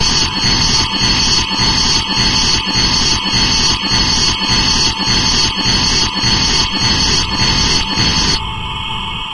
These samples were cut from a longer noise track made in Glitchmachines Quadrant, a virtual modular plugin. They were further edited with various effects.